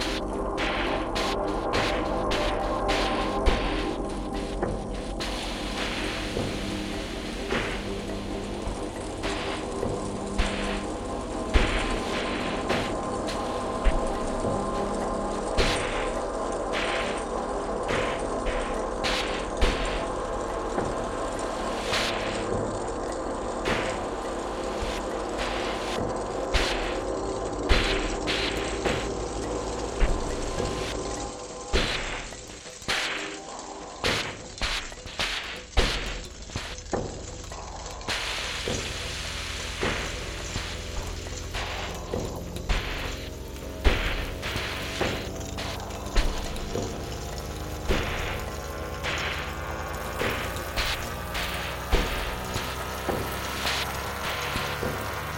BZH Middle Dub Export
Rhythmic pattern created from sampled and processed extended trumpet techniques. Blowing, valve noise, tapping etc. materials from a larger work called "Break Zero Hue"
The high rain like sound is actually thousands of valve sounds transposed many octaves up.